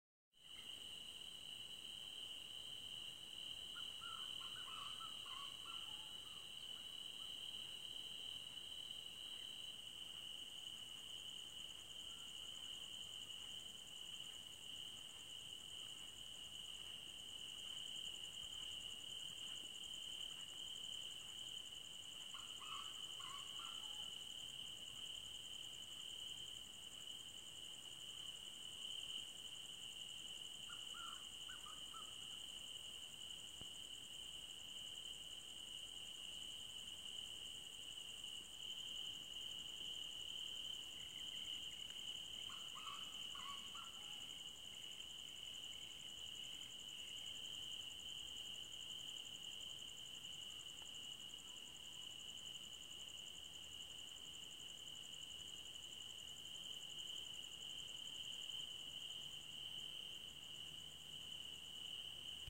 Jungle in Maharashtra at night
At night in the jungle of Maharashtra, India
Dschungel, Goa, Grillen, India, Indien, Maharashtra, Nacht, Urwaldger, crickets, jungle, night, usche